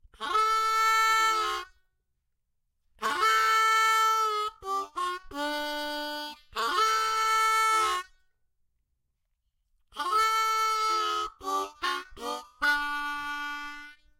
Harmonica Rift Key Of G
I recorded myself playing a harmonica rift.
Harmonica, G, Rift, Key